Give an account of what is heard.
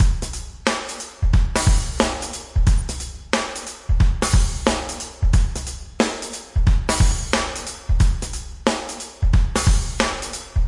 Funk Shuffle B
Funk Shuffle 90BPM